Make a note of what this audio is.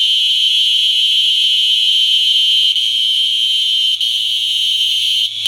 Cicadas: heavy grouping, at dusk, northern Australia. Recording has a somewhat higher pitch than by ear.